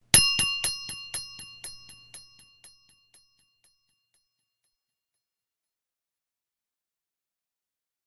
ding on a metal cup with added delay